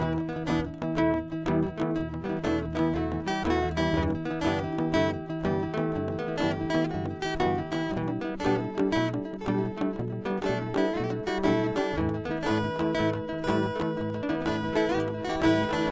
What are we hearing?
In the Pursuit
Layering guitar melodies.
acoustic, groovy, guitar, loop, original